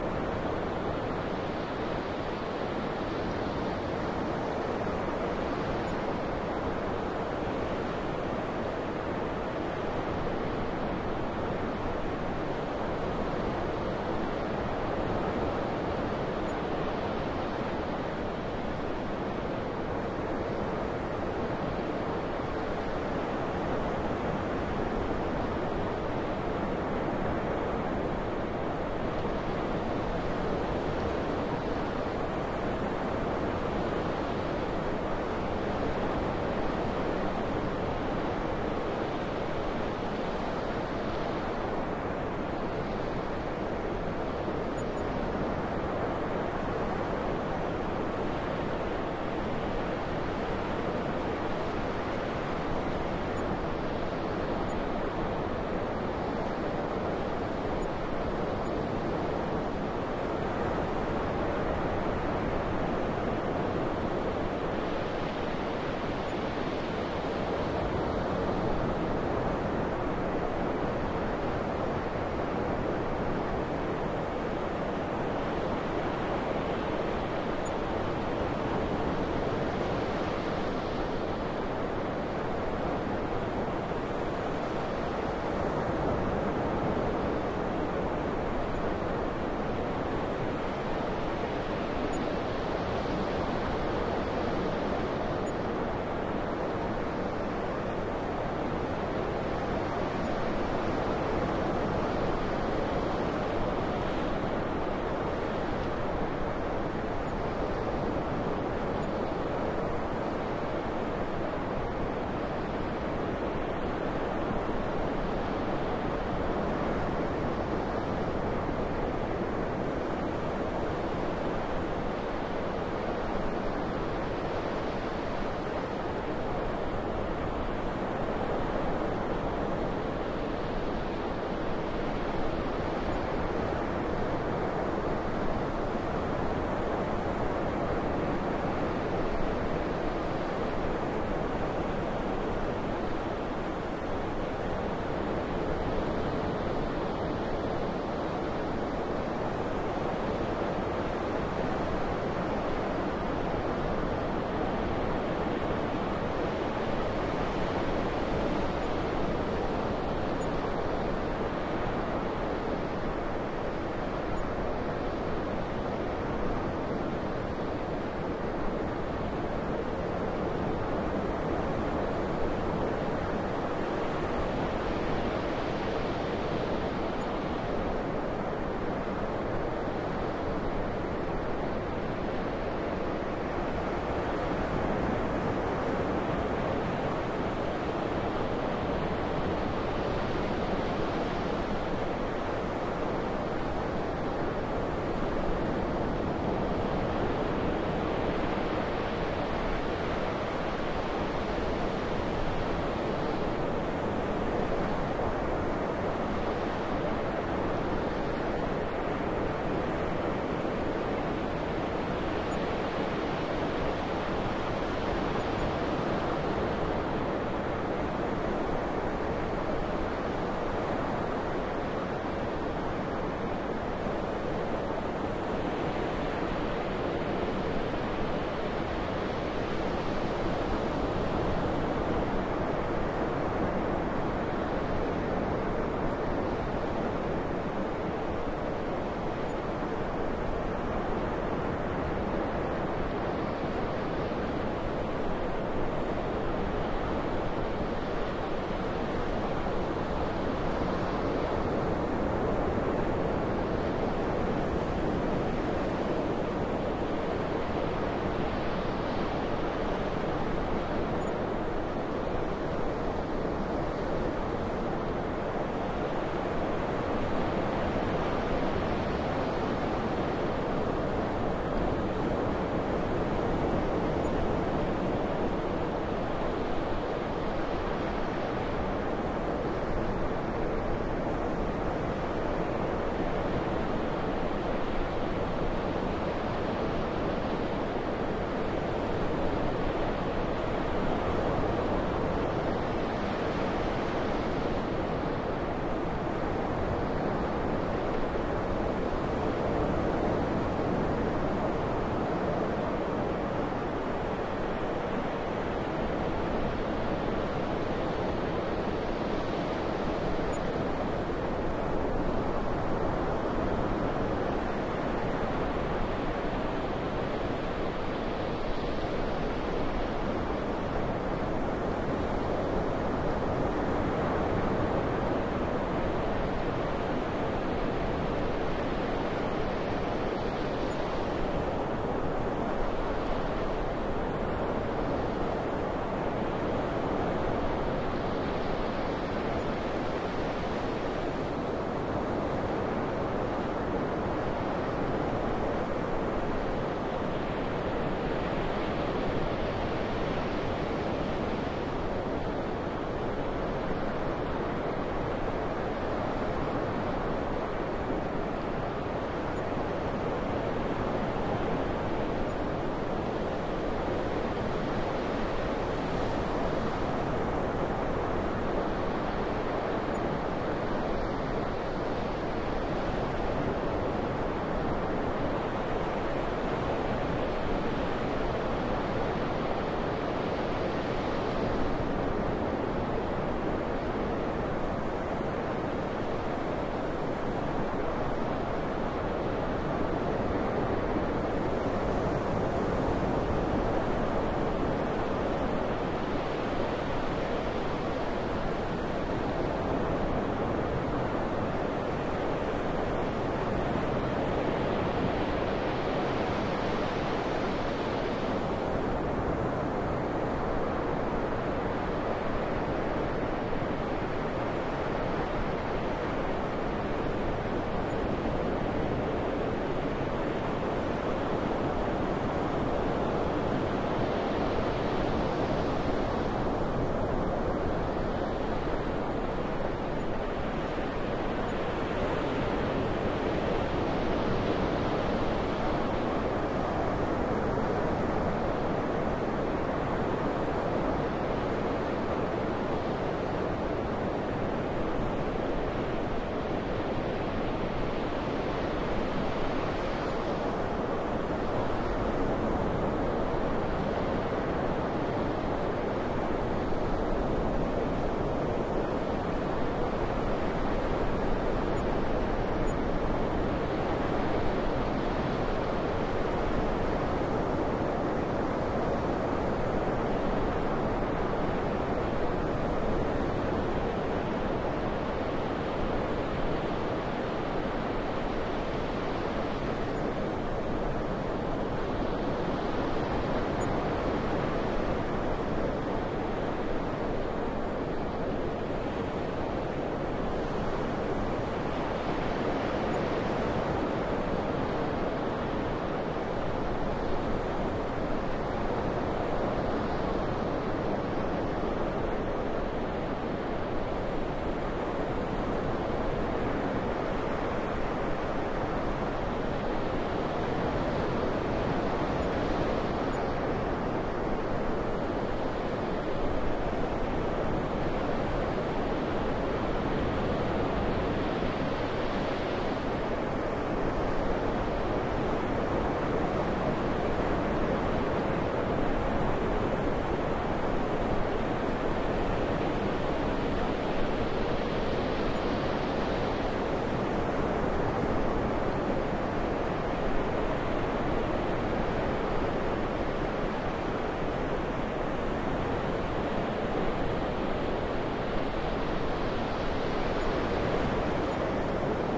morning waves
Henne beach in the morning. Not so much wind as usual, but still enough to make some waves. Sony HI-MD walkman MZ-NH1 minidisc recorder and two Shure WL183
west-wind, denmark, waves, morning, wind, wave, windy, beach